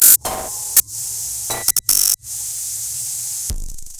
Strange digital noise.
sequence, error, glitch